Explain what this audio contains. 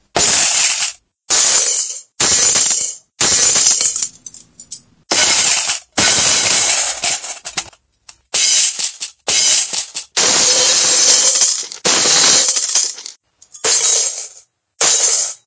Glass break
Shorter sounds of glass smashing / breaking. Made with a metal bowl, spoons and coins.
light, window, glass, break, shatter, bulb, smash, pane